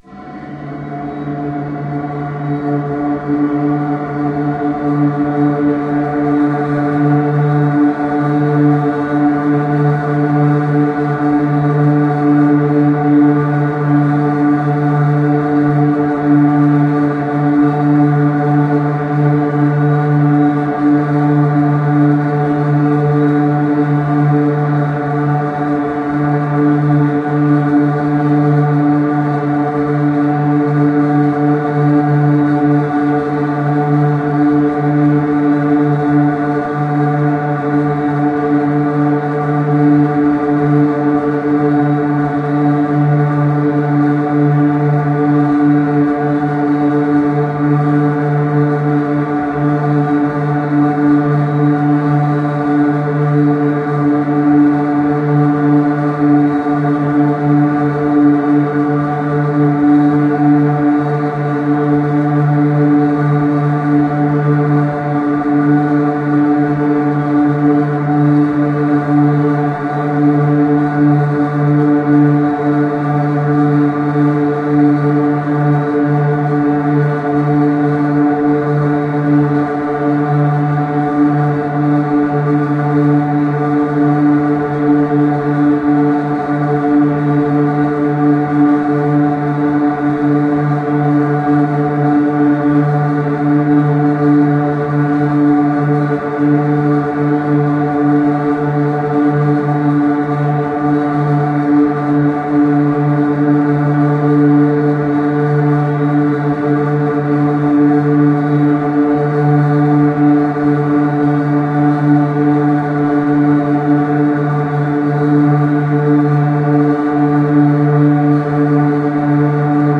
First short pad was generated using custom version of DSK EtherealPadZ 2, with DSK "World Instruments" soundbank loaded. This soundbank contains sounds of various world instruments.
Pad was built from sound of three instruments.
Then generated pad was convoluted on two minutes long white noise stereo signal (independent channels).
Finally, most of residual white noise was filtered out.